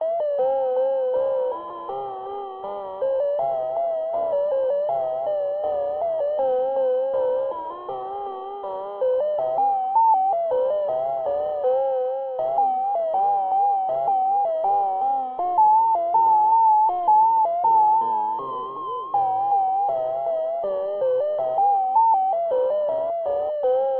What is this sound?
Ice Cream song (Distressed)
I made this a good while ago. Its supposed to sound like a beaten old ice cream truck that is just barely holding it together. Originally created for a visual joke for a video idea that never got to be. I can't quite remember what I did to the poor song, but it sounds really depressed. It is loopable, although not 100% perfect, but that just adds to the aesthetic I think.
ugly, comedic, horrible, ice-cream, distortion, distorted, tune, synth, melody, music, Chime, funny